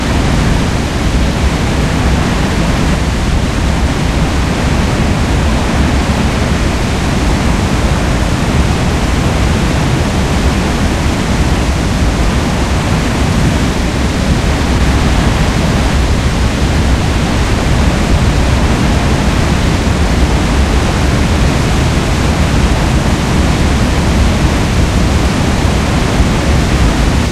Layered recordings of waterfall emphasizing deep lows and misty highs.